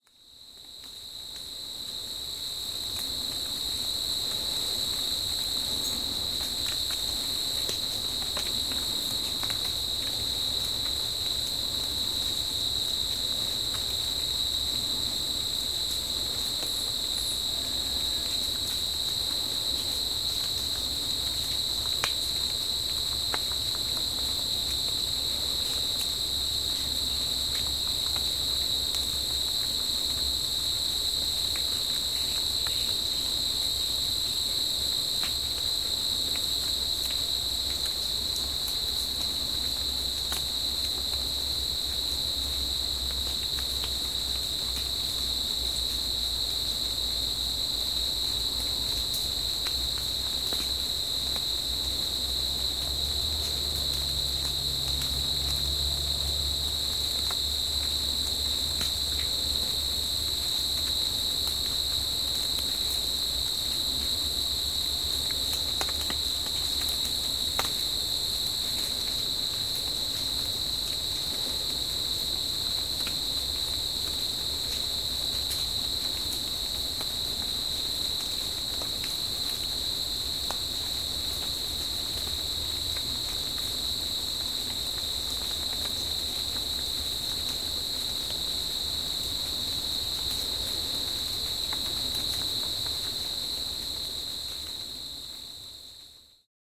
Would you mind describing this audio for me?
Crickets in the beautiful state of Veracruz Mexico
Crickets and Rain drops in the beautiful state of Veracruz Mexico. April 3, 2013 Recorded with my Tascam DR-5